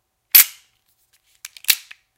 Revolver Cocking
A Black Ops USA Exterminator airsoft revolver having it's cylinder closed and the gun cocked.
Recorded with a CAD GXL2200 microphone.
cock, cylinder, gun, handgun, reload, revolver